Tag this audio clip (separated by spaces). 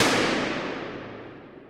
Bang Bomb Boom Explosion foley game gun Gunshot Hit machine Rifle shoot shot studio war